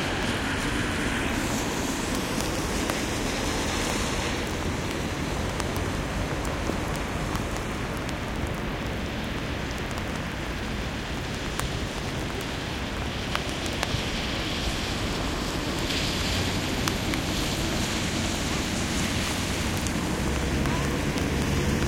A sound of cars riding nearby + some raindrops noise
ambience, asphalt, car, cars, city, field-recording, noise, puddle, rain, raindrops, road, street, traffic, wet